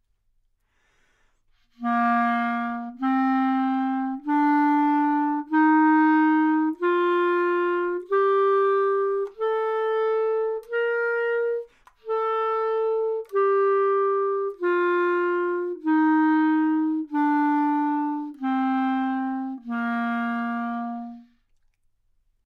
Clarinet - Asharp major
Part of the Good-sounds dataset of monophonic instrumental sounds.
instrument::clarinet
note::Asharp
good-sounds-id::7586
mode::major